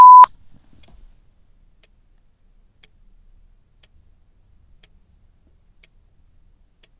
DR70D 150103 0004S12 normalized
Self-noise test of line-level input of Tascam DR-70D, via Rode NT1a and Sound Devices Mixpre-D, of watch ticking. Designed as comparison with Sony M10 and direct digital output (see separate files).
BEWARE OF LOUD 0db test tone at the beginning: turn volume down.
M10, comparison, DR-70D